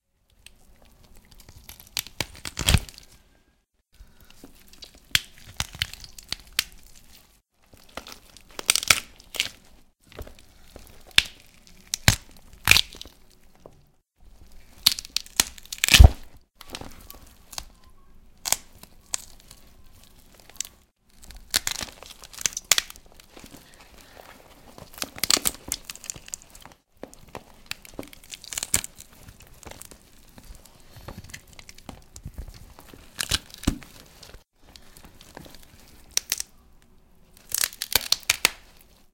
Breaking some bones (rib). Recorded with Zoom H4 + Rode NTG2.